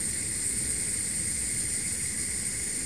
stove.fire.loop
loop, fire, stove